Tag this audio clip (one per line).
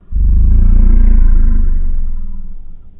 fantasy
rumble
monster
creature
long